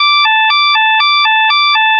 simple 2 tone alert signal